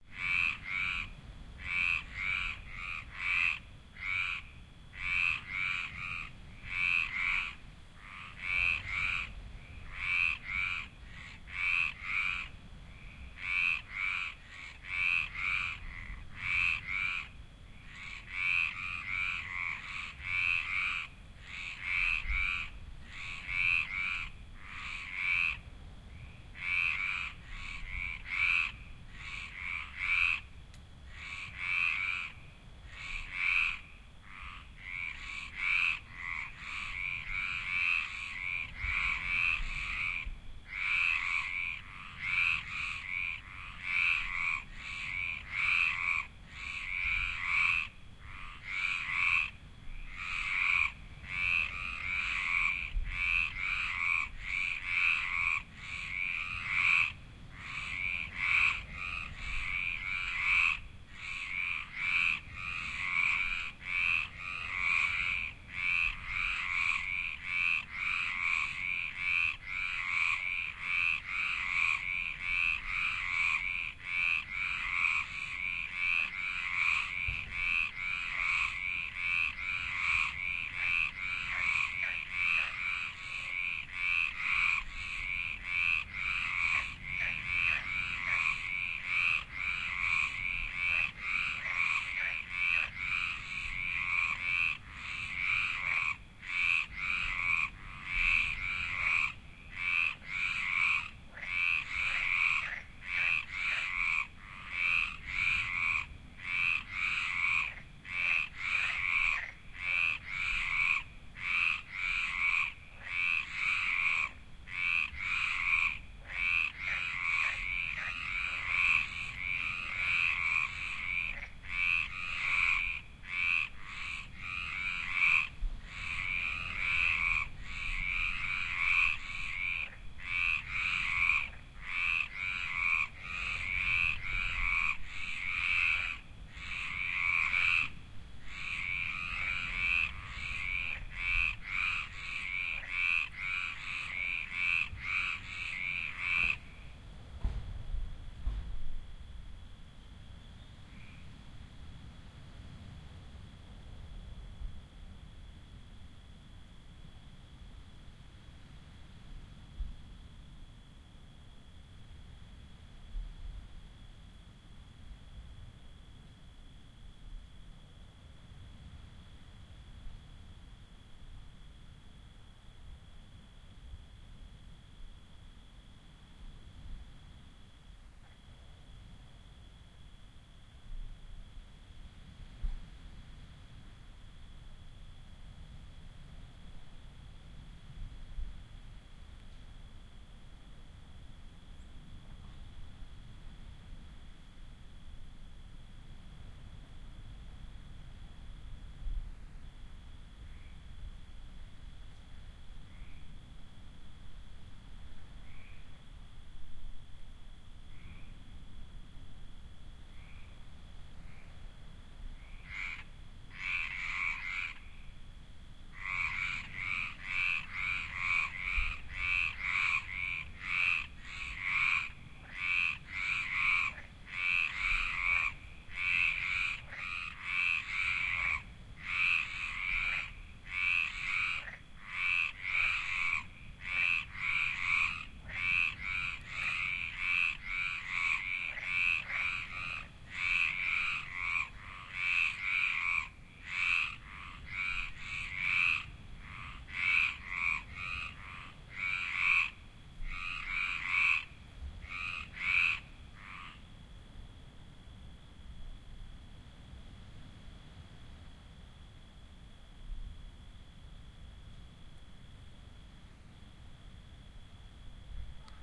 2006 04 16 frogs colliure france
Sitting down in a river bed at sundown, I record the symphony of frogs around me. You can hear two species of frogs (one much lower in frequency than the other). A door slams, and they all shut up for a bit, then they start again, all at once. Recorded with the m-audio microtrack and soundman binaural microphones.
I managed to take a picture of one of the frogs the next day: